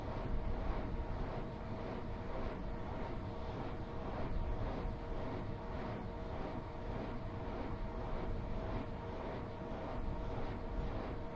wind turbine (binaural)
recording, turbine, wind, field, blades, binaural